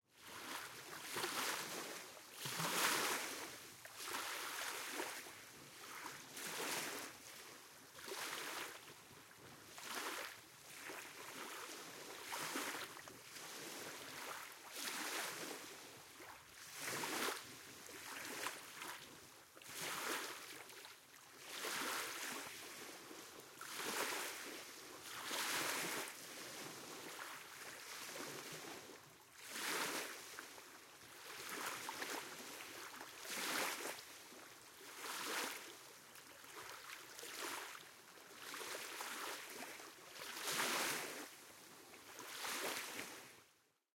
A short 44 seconds recording of the Razim Lake near The Danube Delta in Romania. Use it wisely!
Victor M